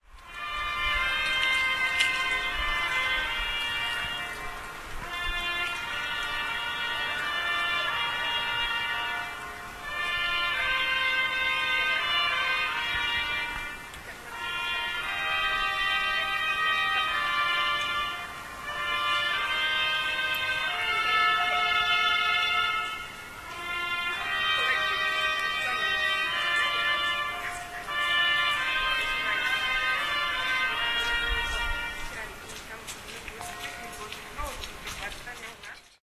after the may mass120510
12.05.2010: 21.00, Powstancza street, Wilda district in the city of Poznan. The sound of the Mother of God hymn played the trumpet. There was just after the end of May Mass in the Church of Maryi Królowej on the Rynek Wildecki street (Wilda district in Poznan/Poland). I was recording that sound two streets down from the church.
field-recording, trumpet, poznan, poland, religious-sounds, wilda, may-mass, street